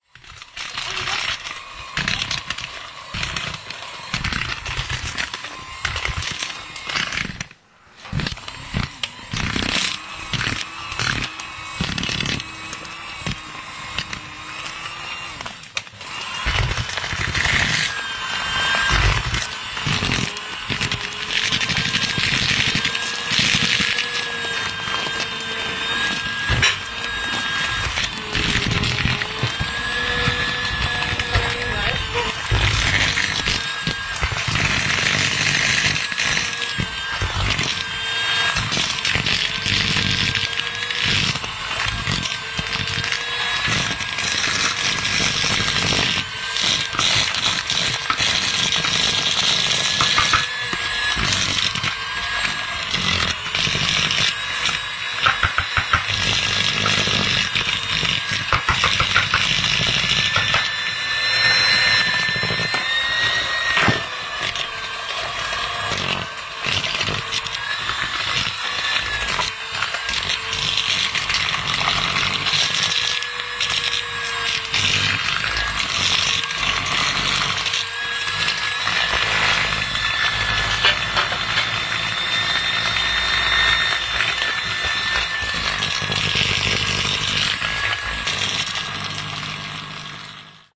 24.12.2010: between 15.00 and 20.00. christmas eve preparation sound. my family home in Jelenia Gora (Low Silesia region in south-west Poland).
noise of mixer: mixing cream.